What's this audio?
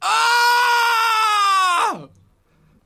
screaming male pain
Male screaming (pain)
Grito, Home, Hombre, Crit, Cridant, gritando, Dolor, Screaming, Scream, Male, Pain, 666moviescreams